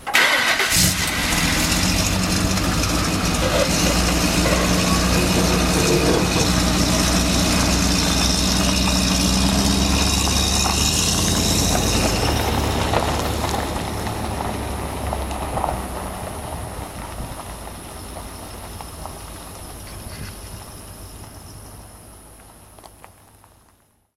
MHDrive Off
Motor-home drives off.
home, automotive, Motor, travel, transport, drive